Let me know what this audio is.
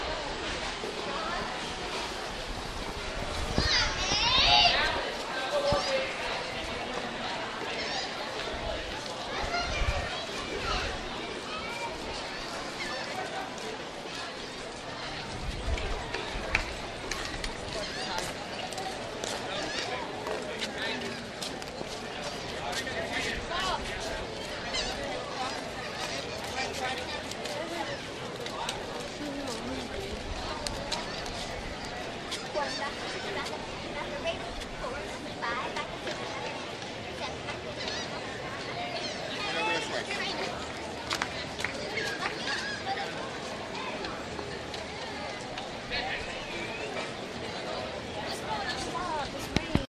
newjersey OC musicpier mono
Monophonic sound out front of the music pier on the boardwalk in Ocean City recorded with DS-40 and edited and Wavoaur.